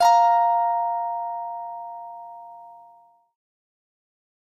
guitar tones 004 string G 22 tone F5+5
This is one note from my virtual instrument. The virtual instrument is made from a cheap Chinese stratocaster. Harmonizer effect with harmony +5 is added
electric, fender, guitar, instrument, notes, samples, simple, simplesamples, stratocaster, string, strings, virtual, virtualinstrument